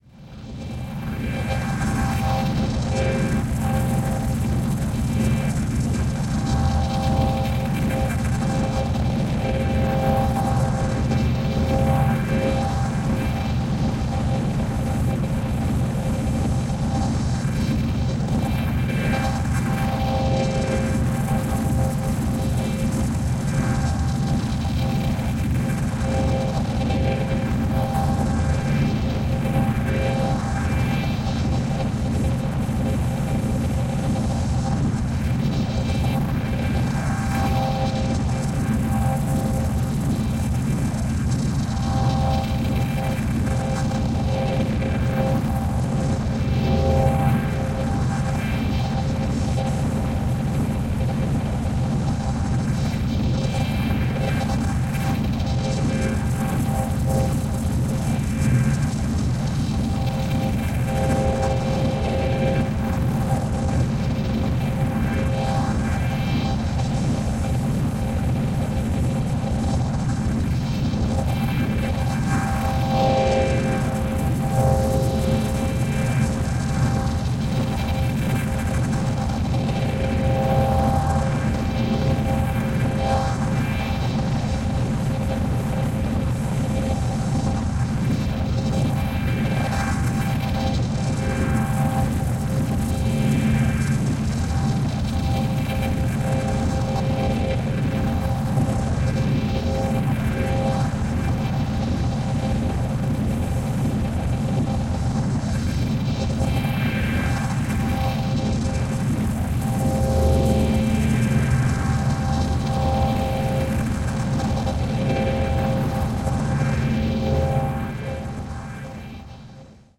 Noise Garden 01
1.This sample is part of the "Noise Garden" sample pack. 2 minutes of pure ambient droning noisescape. Nice harmonic noise.
noise, effect, electronic, drone, soundscape, reaktor